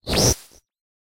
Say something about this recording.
Processed a vacuum sucking noise and added ringshifter for variations.
beam, whizz, wave, vacuum, noise, rub, woosh, synth, suck, whoosh, pressure, fast, plastic